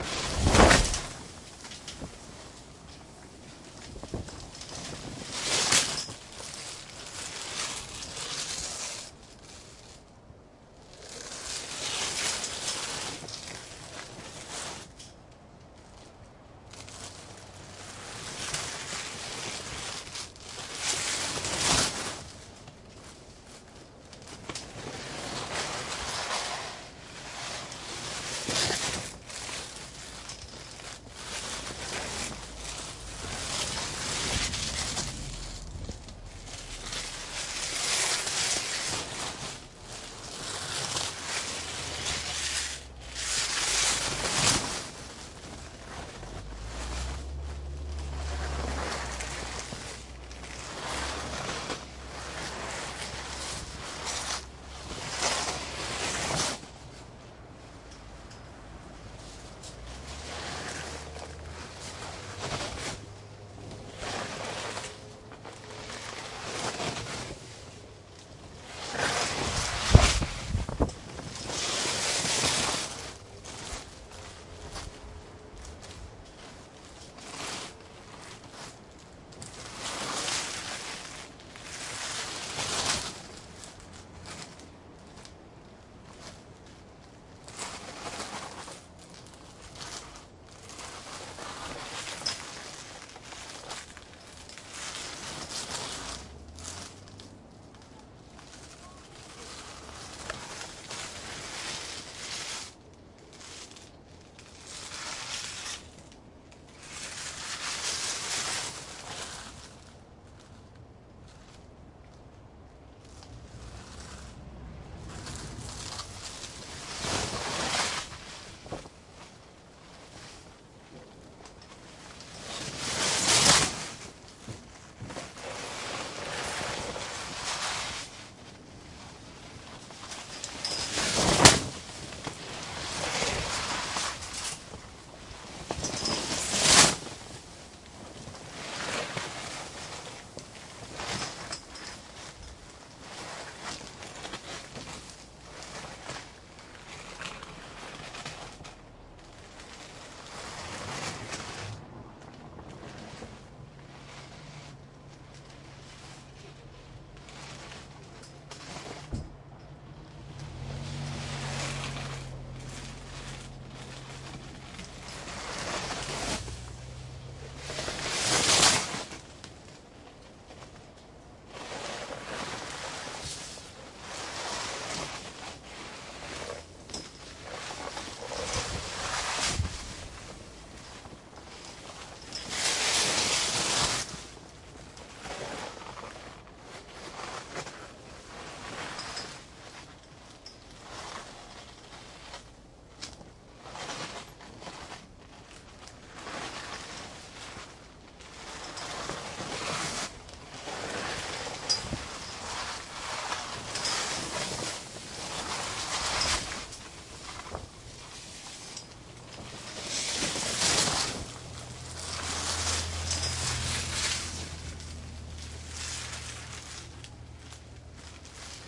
plastic car shetler flap1
plastic car tent flap, with car inside
tent, shetler, car, plastic, flap